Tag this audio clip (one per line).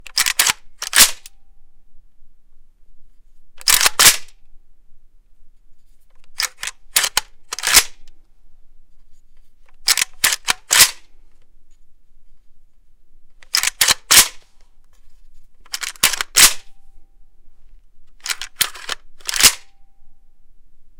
AK47
gun
reload